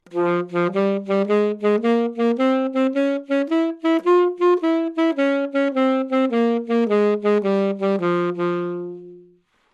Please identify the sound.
Sax Alto - F minor
Part of the Good-sounds dataset of monophonic instrumental sounds.
instrument::sax_alto
note::F
good-sounds-id::6664
mode::natural minor
sax, alto, scale, good-sounds, Fminor, neumann-U87